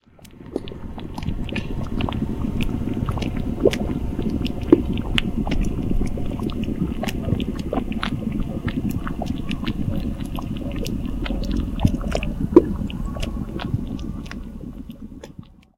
20100501 183714 LakeAkan Bokke
Field recording of mud volcano called "Bokke" at Lake Akan, Kushiro, Hokkaido, Japan. Recorded by Sony PCM-D1.